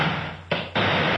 A kit Made with a Bent Yamaha DD-20 Machine